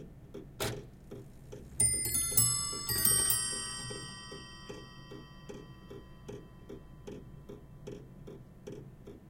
Old Scots Clock - Quarter Hour
18th Century Scottish clock rings the quarter hour. This is such a sweet, unusual clock. Recorded with a Schoeps stereo XY pair to Fostex PD-6.
tick, stereo, 44, clock, 1, ring, scottish, antique, scots